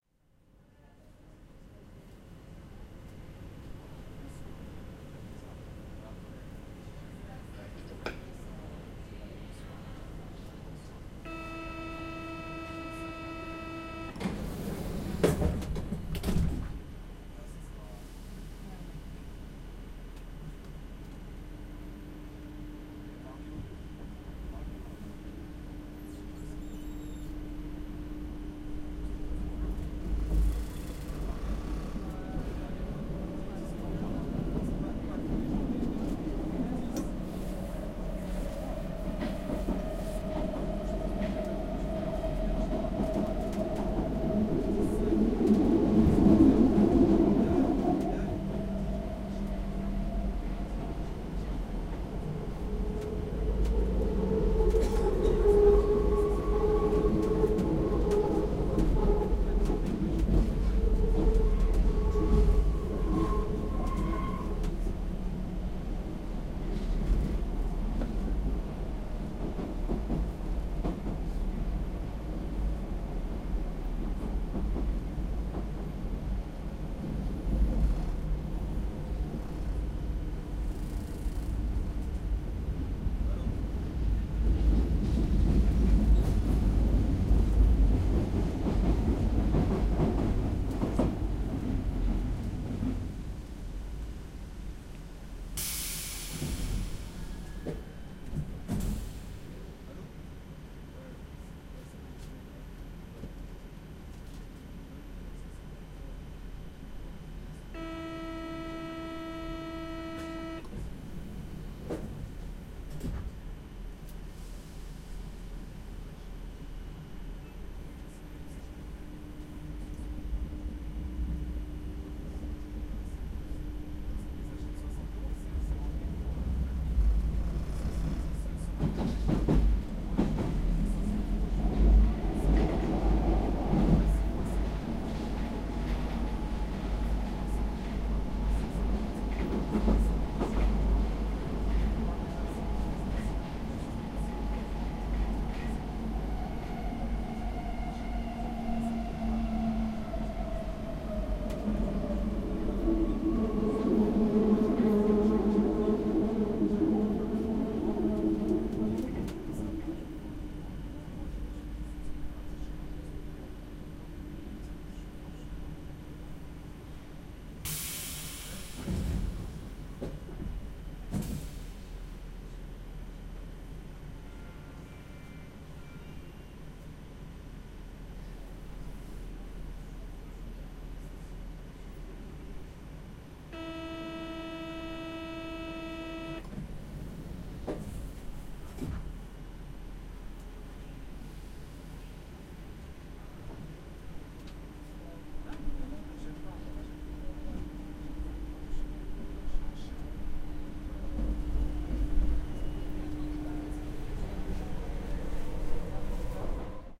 paris subway 01
On the 7 line of the Paris Metro, riding from the Chatelet station to the Sully Morland station, facing a door of the subway car
Recorded on 7 June 2011 with a Zoom H4. No processing.